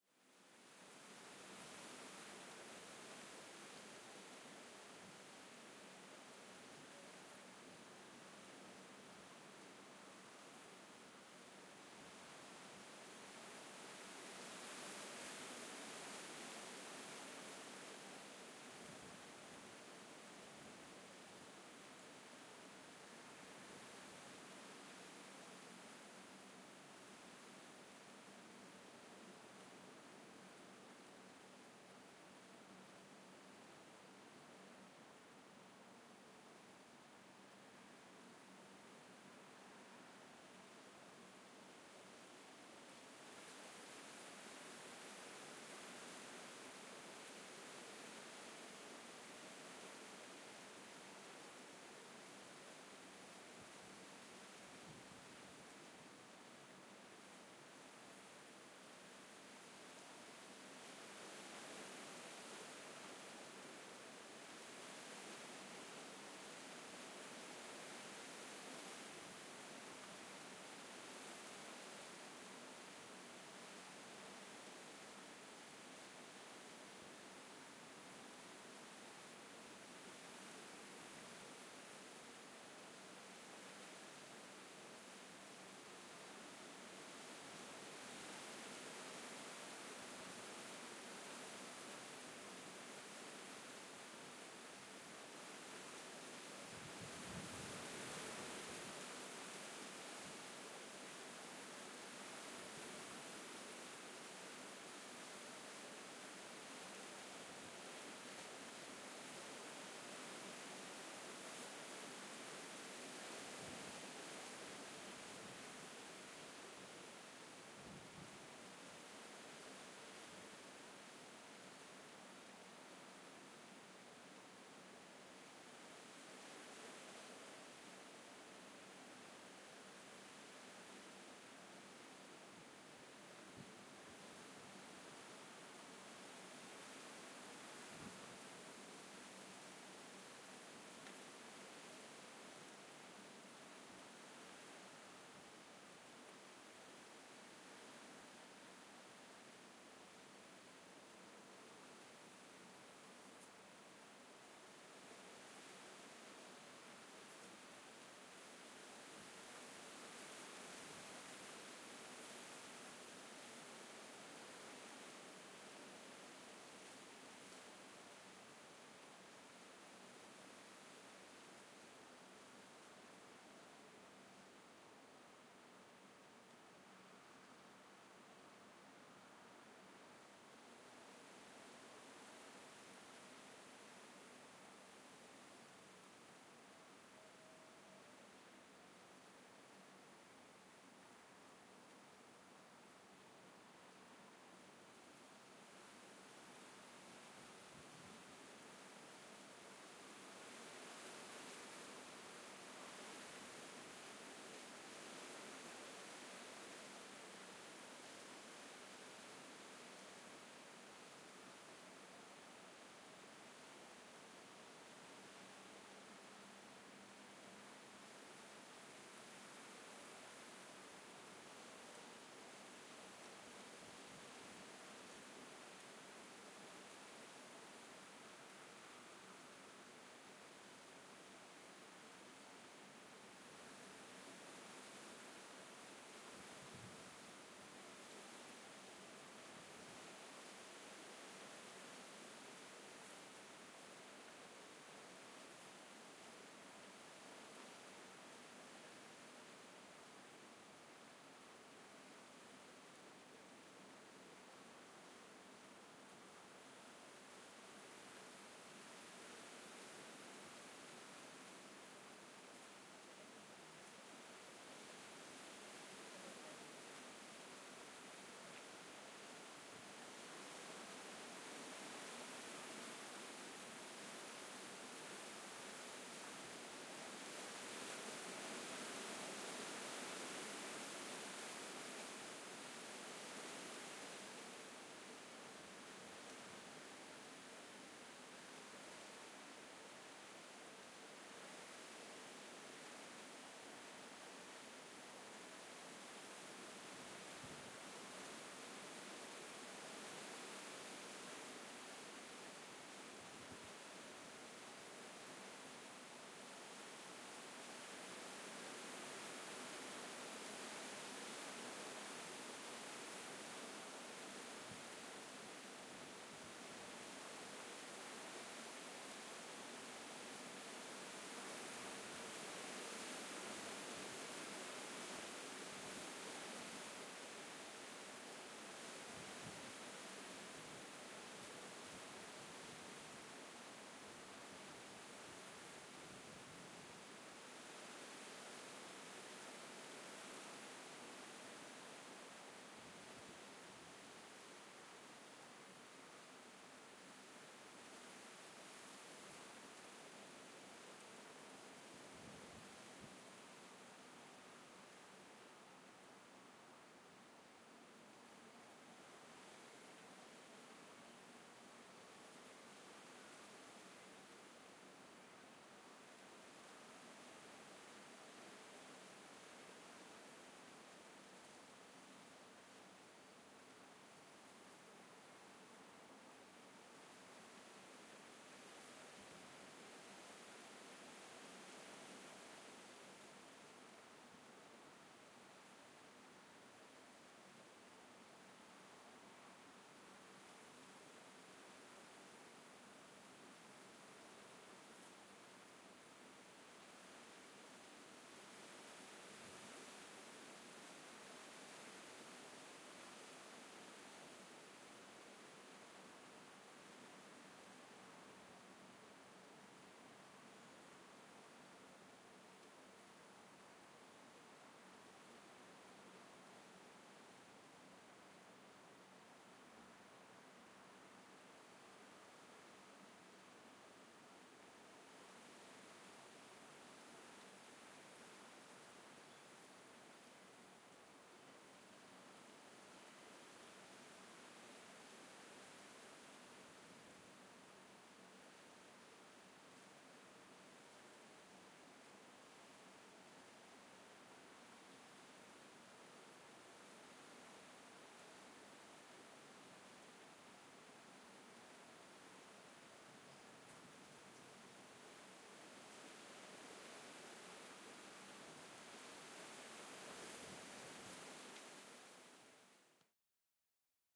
Palm Trees in the Wind
Strong, ceaseless dry wind, varying in strength and blowing through the palm trees at Palmaris Gardens, Playa Blanca, Lanzarote. Occasional very distant voices, birdsong and vehicle noise. Recorded on a Zoom H4n with windjammer.
dry, field-recording, lanzarote, leaves, palm-trees, trees, wind, windy